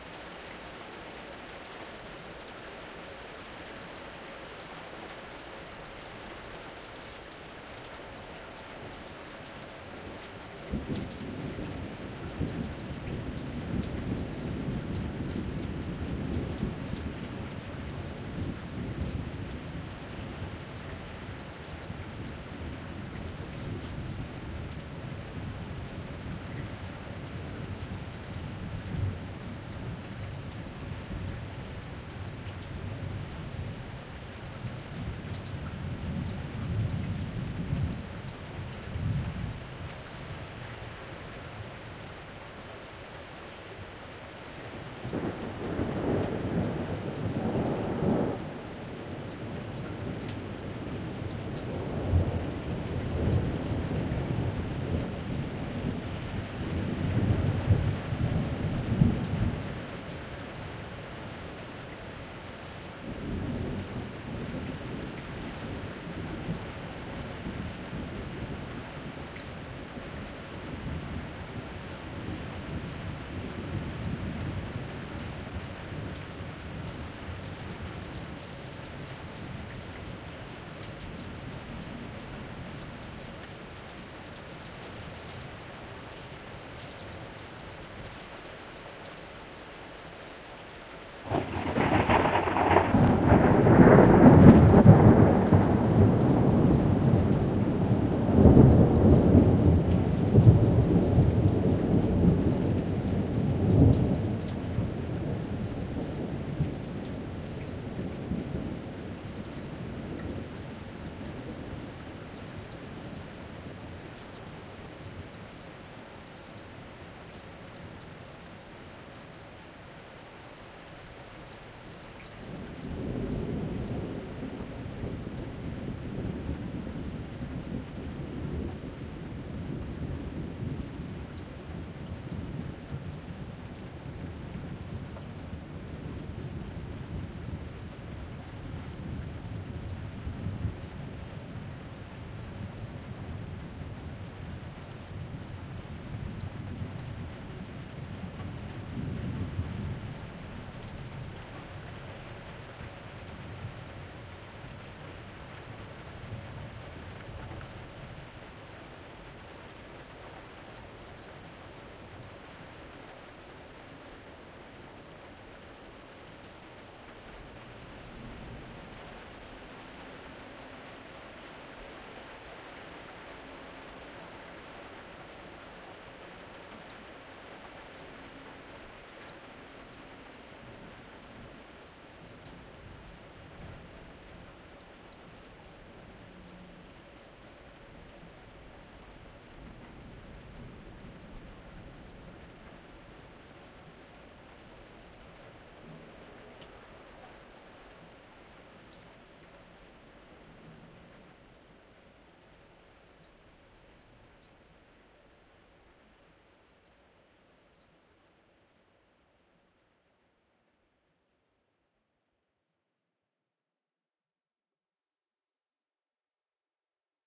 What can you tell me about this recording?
Thunderstorm is passing by
A summer thunderstorm. You hear heavy rain. First roll of thunder at minute 0'08'', thunder at minute 0'45'', a loud clap of thunder at minute 1'30''. After that the rain subsides. Recorded with Zoom H2n in July 2022, Switzerland, Grison.
rain, field-recording, weather, thunderstorm, nature